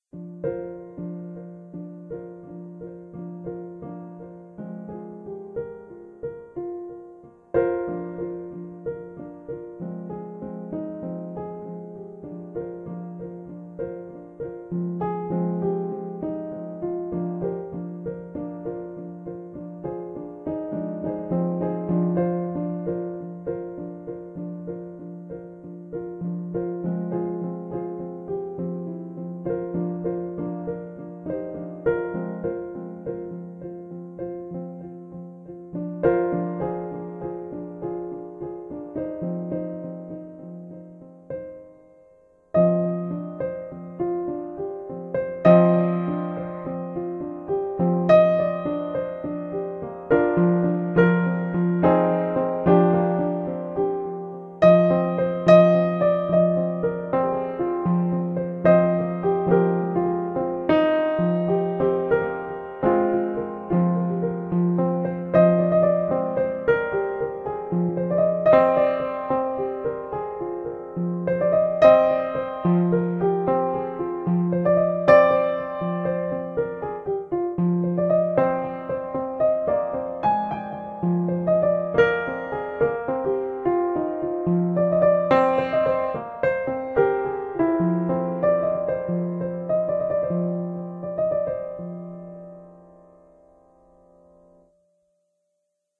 Ambient soft piano music.
Made using
• M-Audio Oxygen 61
• FL Studio
• Independence VST
I'm fine if you use this in a for-profit project, as long as you credit.